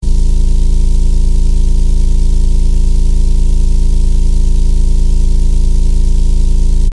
Here is another loop of a car engine I made in Flstudio.
Hope you enjoy it.